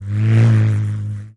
Bullroarer Flyby
A stereo recording of a bullroarer passing the mics.Sounds like a large insect. Rode NT-4 > Fel battery pre-amp > Zoom H2 line-in.